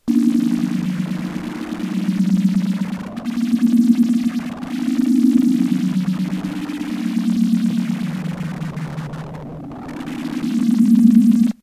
Created with AdSynDX freeware and processed with Cool Edit 96. Fuzz distortion effect applied. Stereo.